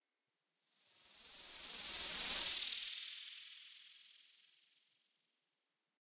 angry snake hiss pass long 2<CsG>
granular passby. Created using Alchemy synth